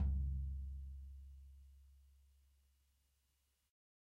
Dirty Tony's Tom 16'' 022
This is the Dirty Tony's Tom 16''. He recorded it at Johnny's studio, the only studio with a hole in the wall! It has been recorded with four mics, and this is the mix of all!
16, pack, raw, kit, drumset, tonys, drum, realistic, set, punk, real, tom, dirty